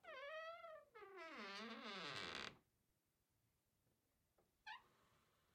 Creaking Wooden Door - 0004
The sound of a wooden door creaking as it is opened.
Household, Wooden, Creak, Door, Squeak, unprocessed